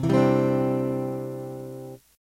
Yamaha F160e Acoustic Electric run through a PO XT Live. Random chord strum. Clean channel/ Bypass Effects.
strum, acoustic, chord, guitar